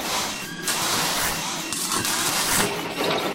Welding conveyor Single LOOP-10
I machine welding and constructing Objects with welding conveyor and Robotic arm and actuators
constructing, factory, I, industrial, machine, machinery, Objects, robotic, welding